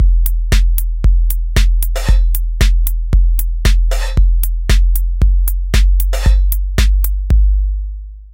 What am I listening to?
Minimal Techno Basic Beat
Simple "minimal" beat. 808 kicks, piercing high hi-hats, and open hi-hats.
808, hi-hats, kick, minimal-techno, open, techno